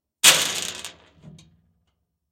throwing pebbles onto metal09
Contact mic on a large metal storage box. Dropping handfuls of pebbles onto the box.
clack, clacking, contact-mic, gravel, impact, metal, metallic, pebble, pebbles, percussion, percussive, piezo, rocks, rubble, stone, stones, tap, tapping